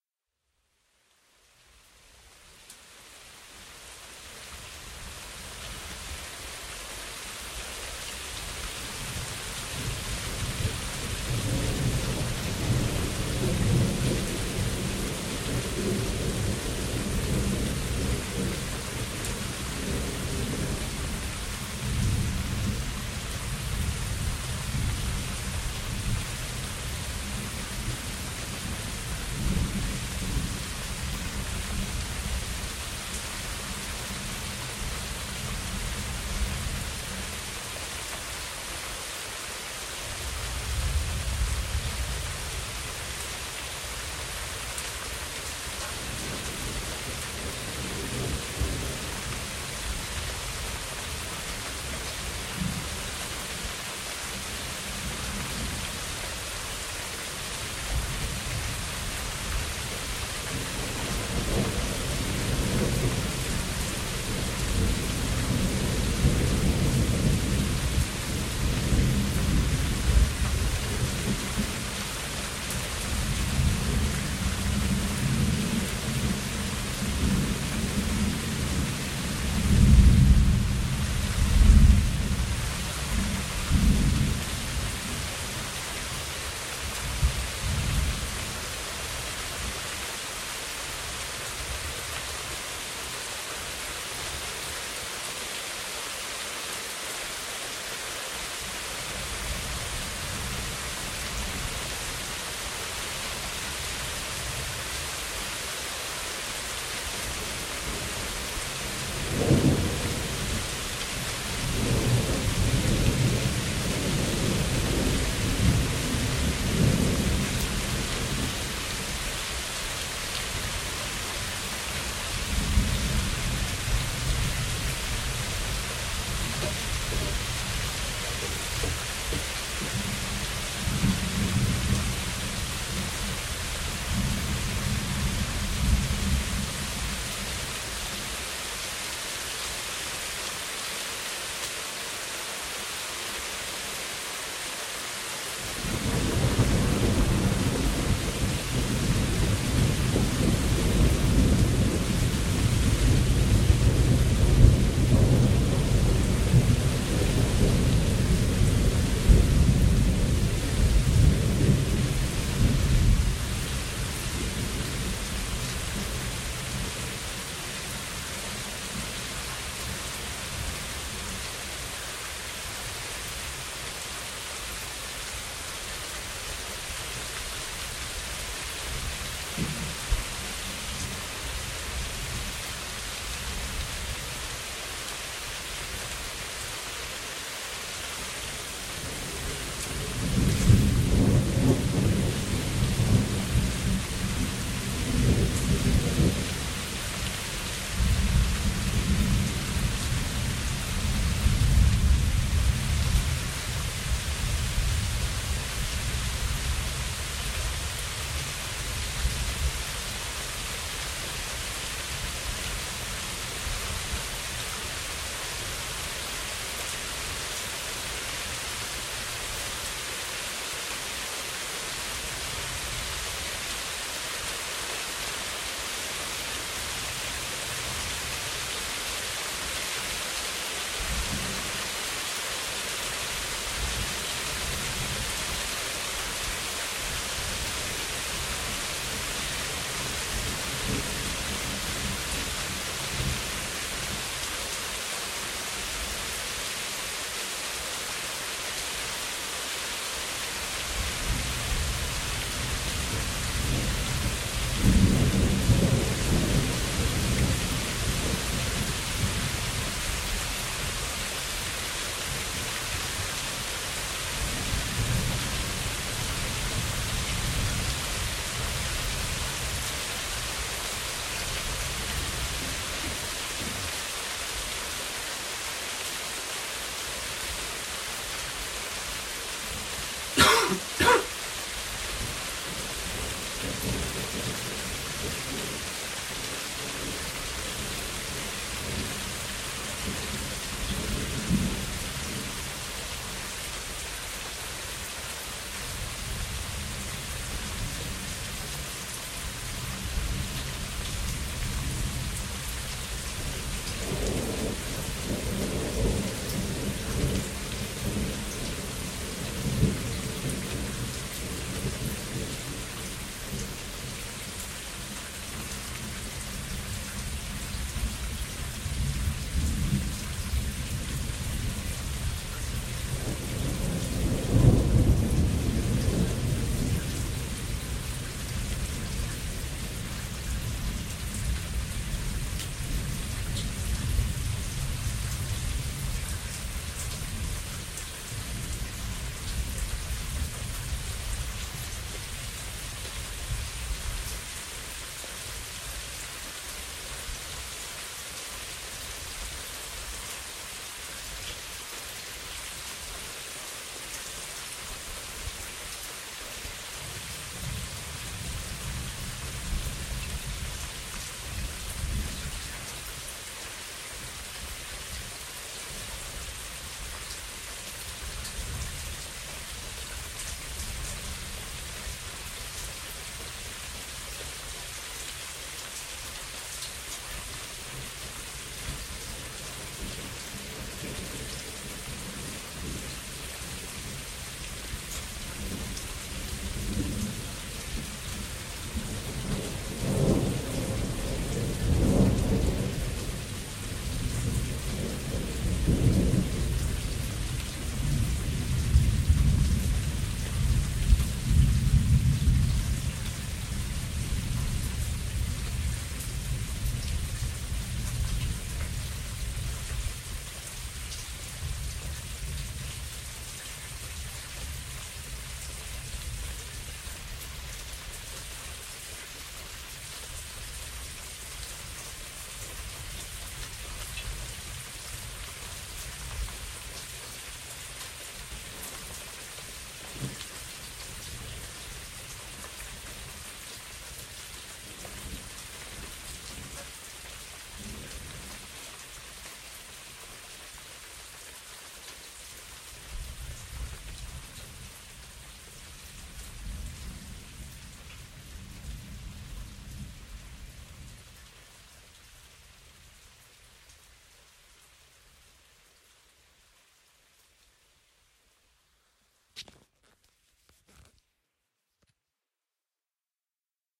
rain / light thunder, often
4:40 little cough
process of rain, goes light in end
ambience, natura, rain, thunder